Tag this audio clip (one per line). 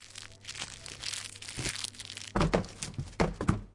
campus-upf
chocolate
recycle
UPF-CS12
bin
paper